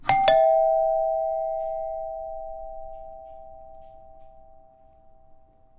Hey, a doorbell!
ring, door, bell, doorbell, chime, sound-effect, foley